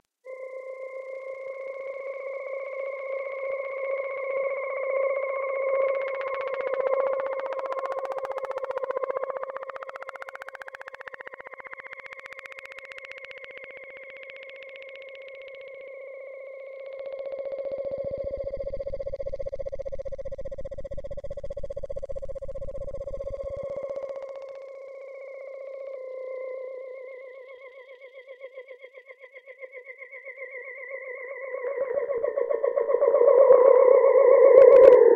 A message from Outerspace
A cryptic message from Outerspace
outerspace, horror, sci-fi, alien